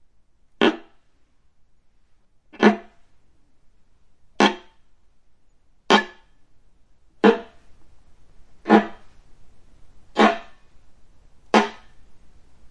grabby bow original
OK, I don't know how many of you might be interested in this, but I figure there's no harm in posting it.
I'm working on some original songs. Laptop-based, electronic songs, with many orchestral parts, including violin, viola, cello, and string bass. Presonus Studio One has some very nice VST string instruments, and I have some really great ones for Kontakt. But they all are missing one thing, and I couldn't find the (admittedly esoteric) sound that I'm looking for anywhere on the internet. Being a viola player myself, I recorded myself playing these very particular incidental sounds. Let me explain-
There's this 'grabby' sound that a well-rosined bow makes just is it is first being drawn across the string. Listen carefully to any of the pros and you'll hear it. In your laptop sequences, if used subtly, right at the point where the first note of a phrase is initiated, this sound can give the string part a marked sense of realism*.
viola, orchestral, bow